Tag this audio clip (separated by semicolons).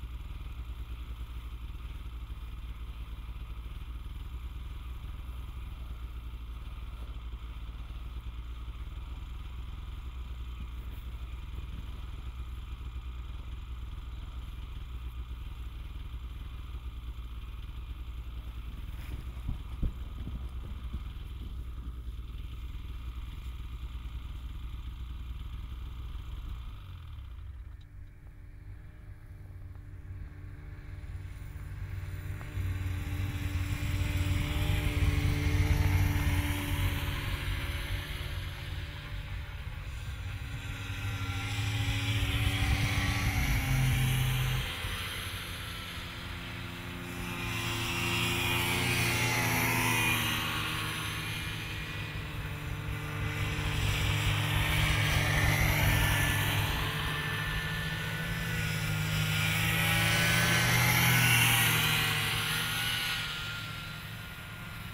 by; pass